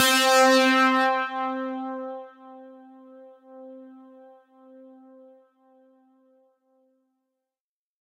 This sound was created using ZynAddSubFX software synthesizer.
Basically it's a distored 'pluged string' sound.
I used the integrated wave recording to sample the notes.
synthesizer, distored, zynaddsubfx, multisample